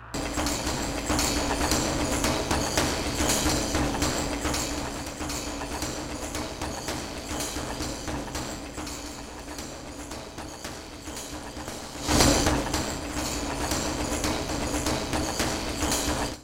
dub drums 019 dubjazz
reaktor, sounddesign, experimental, drums, dub